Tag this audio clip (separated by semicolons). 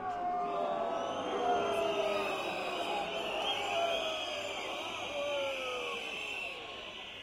demonstration; labour; whistle